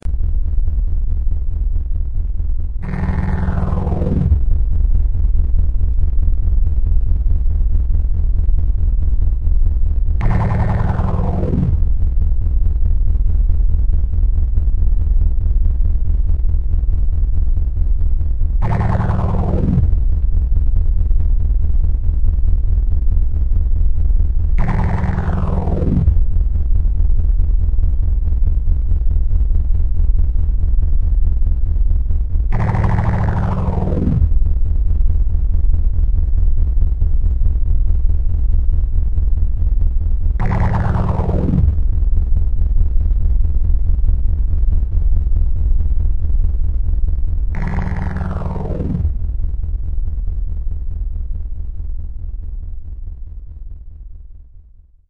SYnth NoisesAF
A small collection of SYnthetic sounds of varying frequencies. Created with amSynth and several Ladspa, LV2 filters.
Hope you enjoy the sounds. I've tried to reduce the file sizes due to the low bandwidth of the server. I hope the quality doesn't diminish too much. Didn't seem too!
Anyhoo... Enjoy!
Rhythmic, machines, weird, fictional, Synthetic, Noise